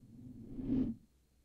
deep-air-woosh
The deep woosh of an object as it passes close by the camera
Part of the filmmakers Archive by Dane S Casperson
~Dane Vandewiele
displaced-air, whip, deep-woosh